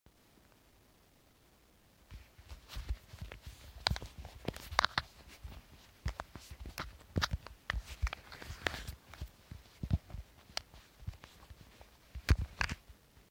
hands on phone noise
holding, grabbing, shaking, moving my phone to capture the sound of camera/mic movement.
grabbing-microphone, camera-movement, movement, rustling, touching, microphone-movement, shaking, microphone, mic